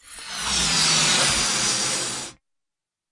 Balloon-Inflate-14
Balloon inflating. Recorded with Zoom H4